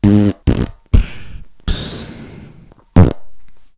An SM-57 used to record the sound of a fart.
Wind, Poop, Pass-Wind, Fart, Trump, Poo, Pooping, Ill, Pooing, Toilet, Farting, Gas